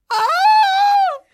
a girl shouting for a terror movie. 666 movie scream UPF